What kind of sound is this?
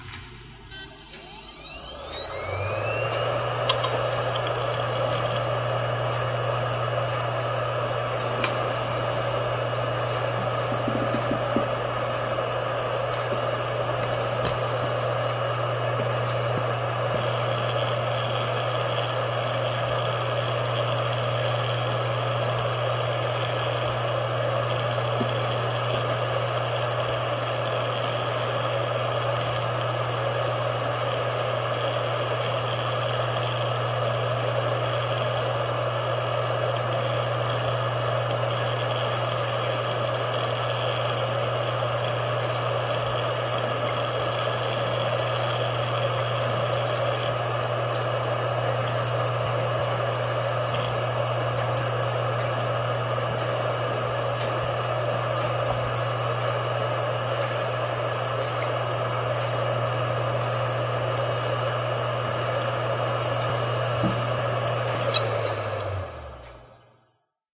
A Maxtor hard drive manufactured in 2003 close up; spin up, writing, spin down.
This drive has 1 platter.
(maxtor 6E030L0)